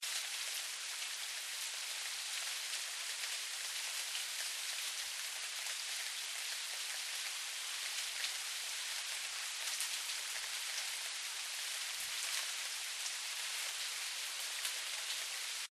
AMBIENT - Rain - Light (LOOP)
Soft looping rain outdoors. Recorded from between two houses. Raindrops can be heard splattering on pavement and tree foliage. Clear and Clean. Fresh sounding.
Recorded with Zoom H4 Handy Recorder
clean, droplets, field-recording, nature, outdoors, rain, rainfall, shower, sprinkle, weather